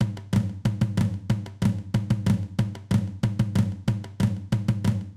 ethnic beat10
congas, ethnic drums, grooves